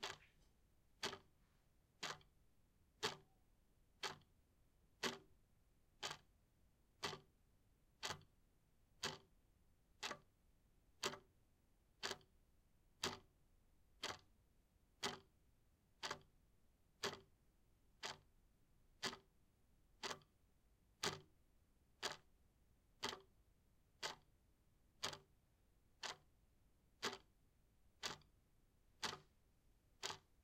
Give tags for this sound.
mechanic
tick

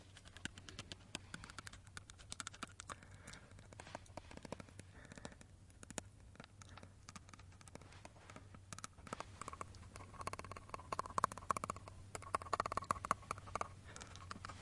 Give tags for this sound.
fear
chatter

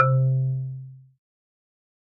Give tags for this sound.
instrument
marimba
percussion
wood

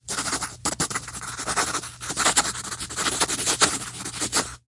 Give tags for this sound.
paper
pencil
sfx
write
writing

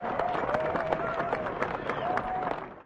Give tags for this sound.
Box
Applause
Speak
Chats
Talks
Patwa
Speaking
Sound
Patios
Nova
Crowd
Talk
Talking
Jamaican
NovaSound
Speaker
Chatter
Vocal
Chat
American
Walla

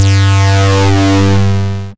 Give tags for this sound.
Sound
Synth

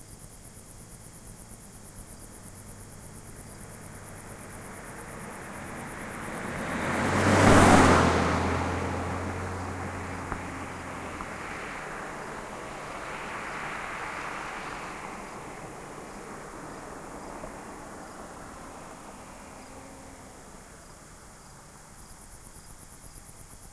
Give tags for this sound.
crickets
drive-by
car

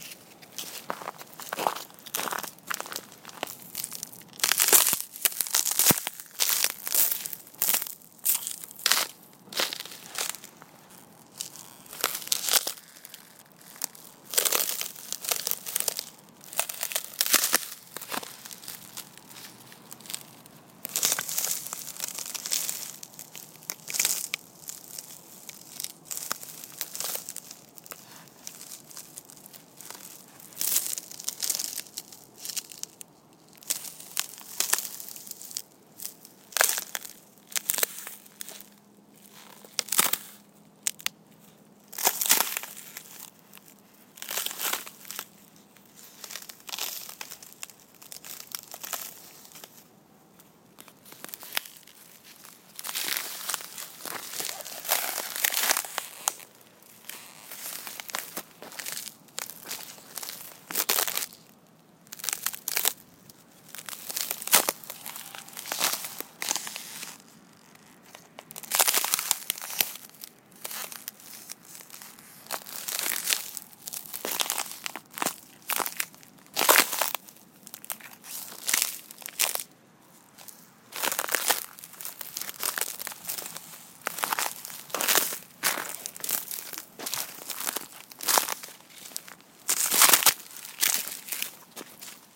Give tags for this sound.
winter
Ice
cracks
frozen
cracking

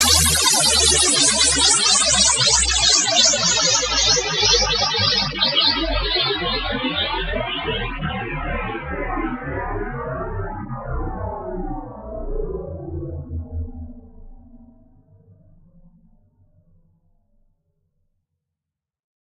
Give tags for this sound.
Fall
Destruction
Landing
Crash

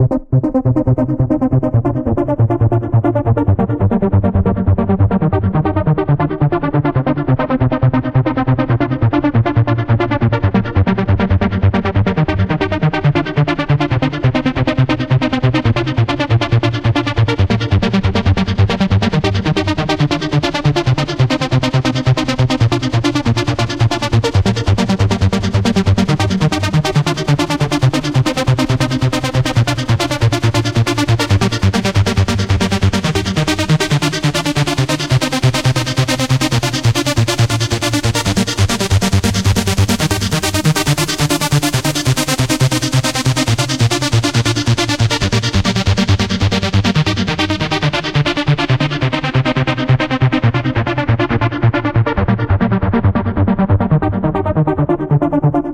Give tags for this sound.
Music
Progressive
Techno
Trance
Trumpet

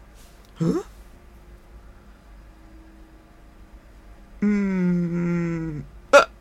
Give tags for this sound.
pain
scary

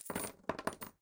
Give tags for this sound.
nickel
dime
change
currency
penny
quarter
metal
money
coins
coin

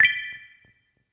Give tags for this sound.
app
beep
hud
positive
button
Game
ui

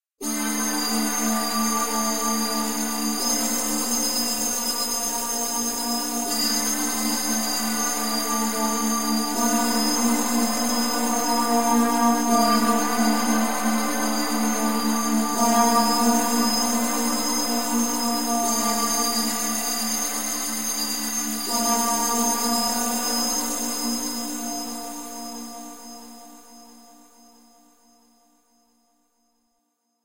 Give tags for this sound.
ambience
ambient
atmosphere
background
bridge
dark
deep
drive
drone
effect
electronic
emergency
energy
engine
future
futuristic
fx
hover
impulsion
machine
noise
pad
Room
rumble
sci-fi
sound-design
soundscape
space
spaceship
starship

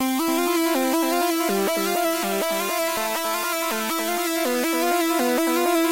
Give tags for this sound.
melodic
high
162-bpm
hard
synth

